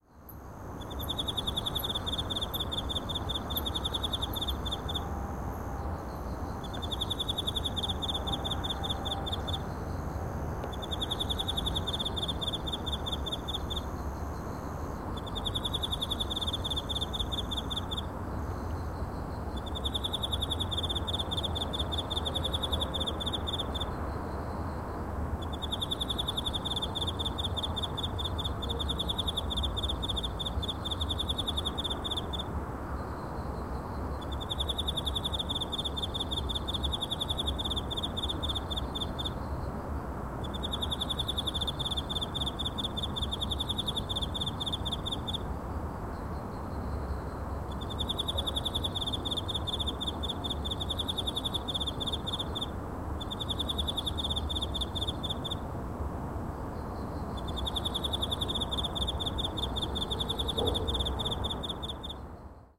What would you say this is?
china cricket
a close up of a chinese cricket with faint sounds of the city in background. recorded in beijing.